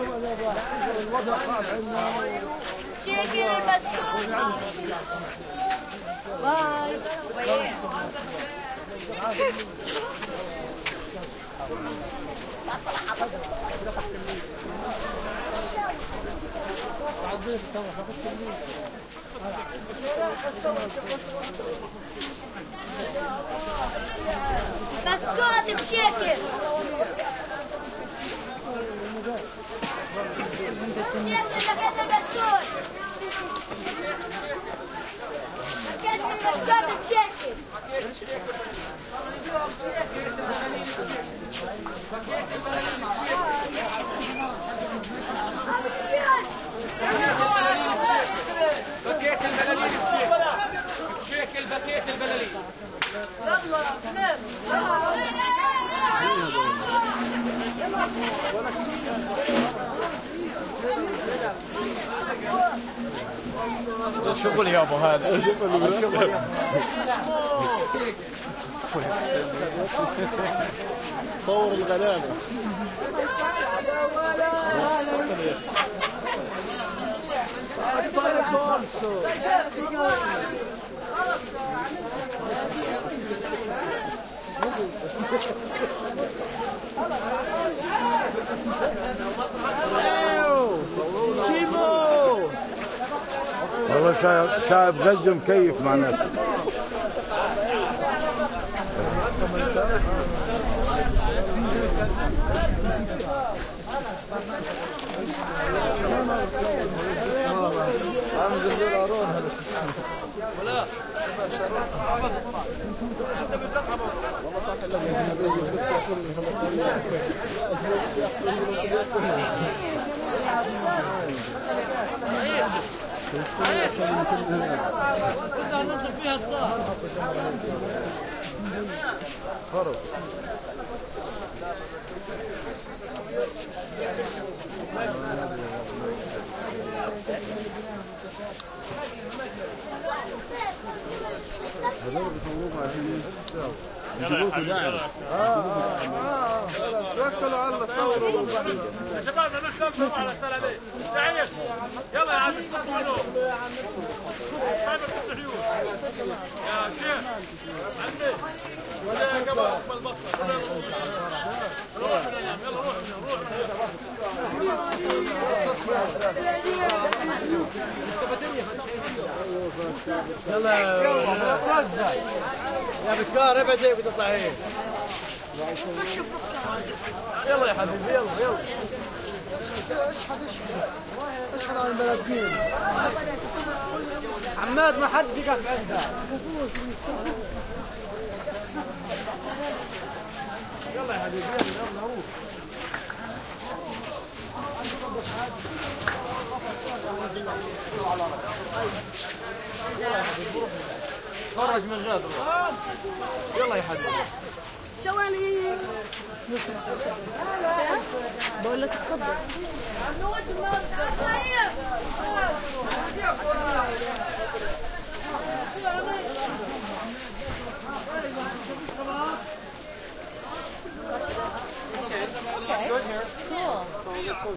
market ext clothing covered alley Palestine busy crowd arabic walla and sandy steps3 Gaza 2016
market, busy, walla, clothing, Palestine